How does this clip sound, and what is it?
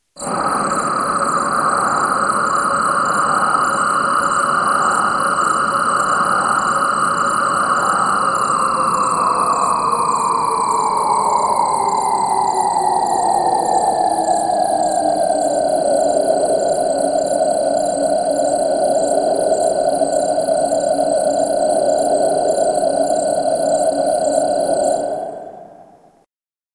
Strange sounds of bugs.